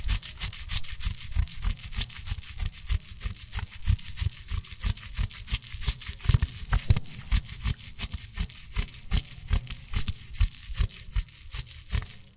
wet rag rub
Cleaning and rubbing a surface with a wet rag.
rub, cloth, dishrag, fabric, grind, friction, cleaning, rubbing, rag